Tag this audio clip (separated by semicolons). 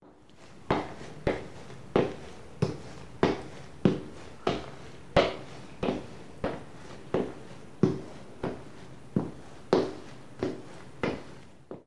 Footsteps; Walk